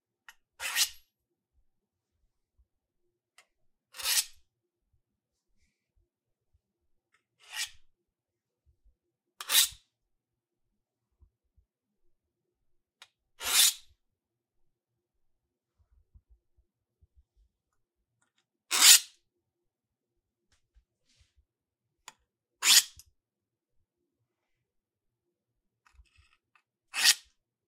Large kitchen knife scraped along metal.
sharp, scrape, knife